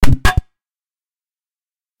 Reinforcing Membrane Clicks More Reinforcing
UI sound effect. On an ongoing basis more will be added here
And I'll batch upload here every so often.
Membrane, More, UI